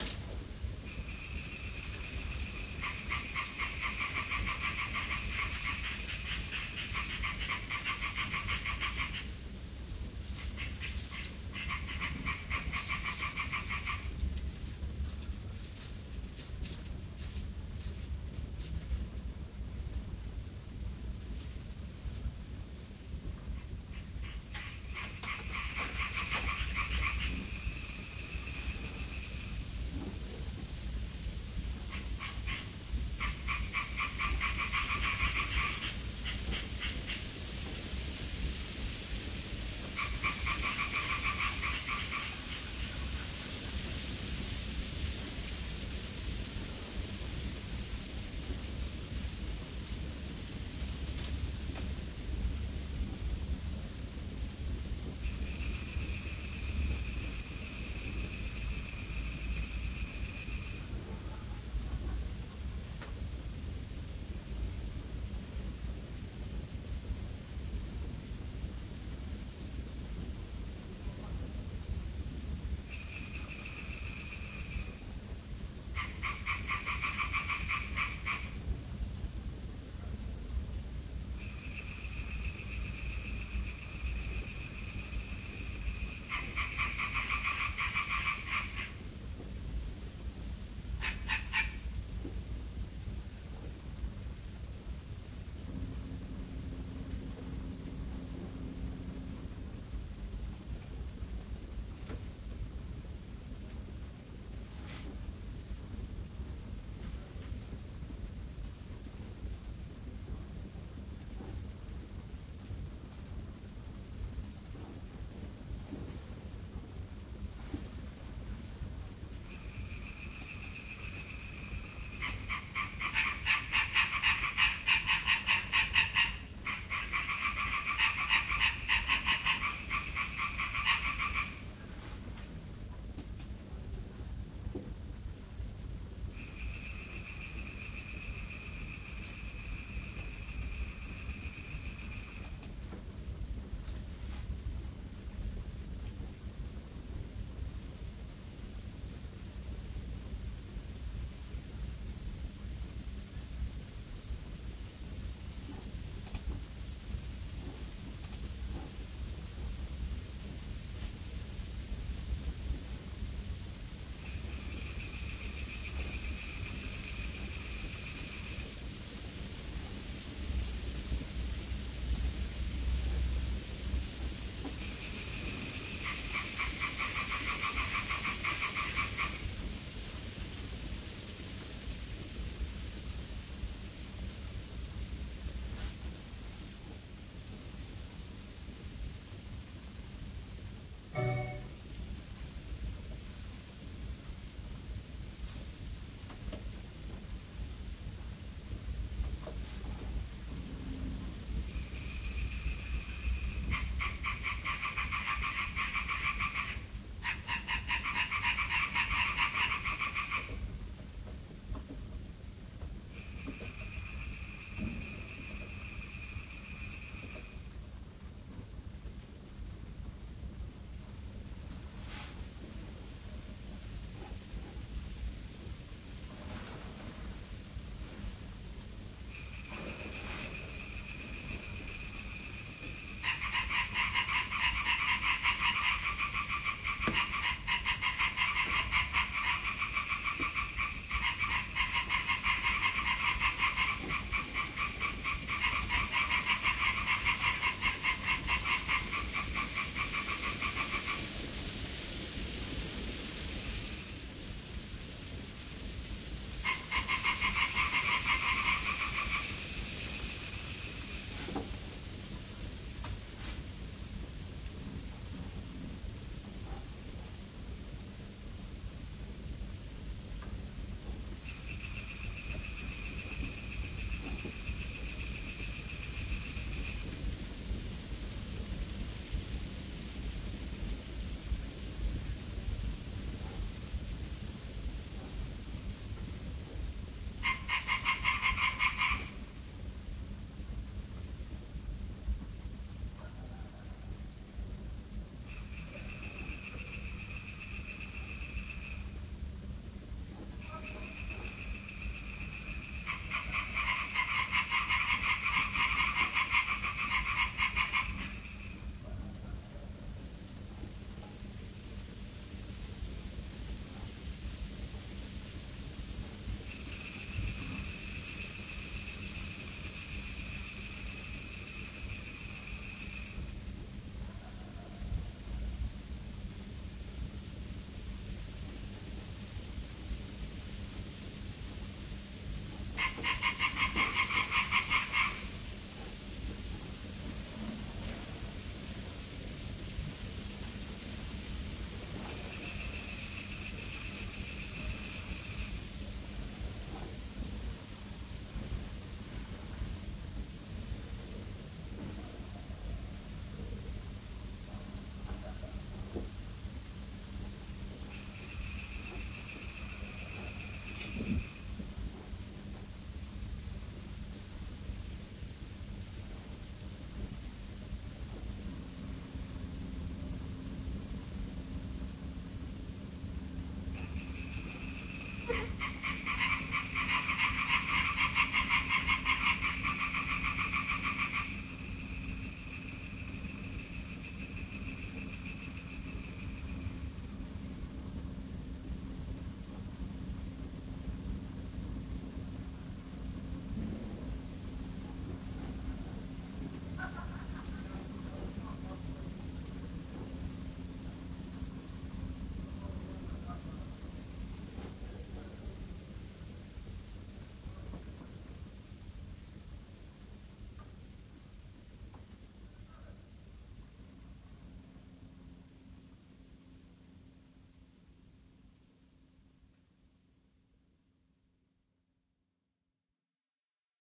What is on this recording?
night : frog and birds / soft night wind
not clean / working with computer in backround / slovakia natura, recorded at night, feels the atmosphere / I dont realy remember, but sounds like fire also, and little white noise dont know where comes from :)
frog, night, natura, birds, wind, bird